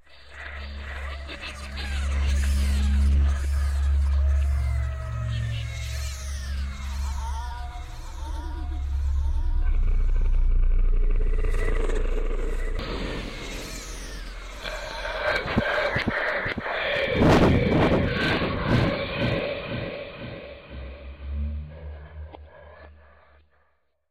Scary Demon Haunting Sound - Adam Webb - Remix 4
As an edit, full credit should go to SoundBible and Adam Webb.
creepy, demon, evil, Halloween, haunted, haunting, possessed, scare, scary